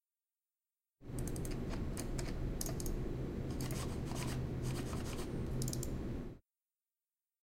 Mouse PC
This sound shows the mouse noise when someone is clicking and moving it. Furthermore, there is a background noise corresponding to the computers' fans.
It was recorded in a computers' room in Tallers building in Campus Poblenou, UPF.
campus-upf, Computer, Mouse, Office, PC, Tallers, UPF-CS14